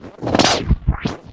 Squelchy rhythmic pitch warp.Taken from a live processing of a drum solo using the Boss DM-300 analog Delay Machine.
analog, glitch, lofi, warped